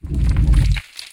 Heavy Stone Push
A stone object pushed and dropping some debris.
drag, heavy, lid, push, rock, stone